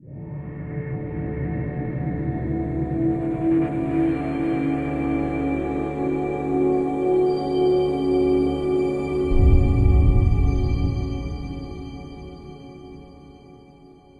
A short atmospheric piece I did for a friend's slow-motion video. However, he didn't use it, so I thought I'd let you. Made with Camel Audio's free Alchemy Player VST.